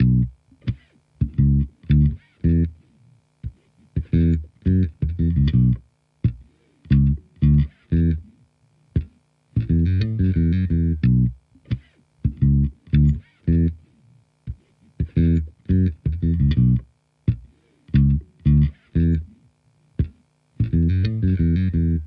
Bminor Funk BassGroove 87bpm
Bminor_Funk_BassGroove_87bpm
Bass Guitar | Programming | Composition